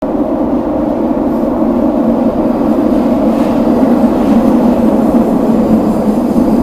The sound of a subway in movement.
metro, transport, underground, public, subway